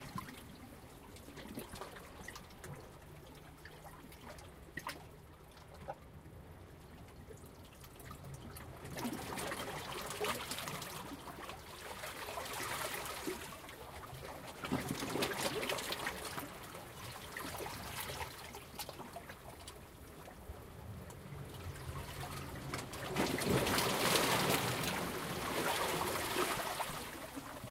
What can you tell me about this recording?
Taken with Zoom H2N, the beaches of Cyprus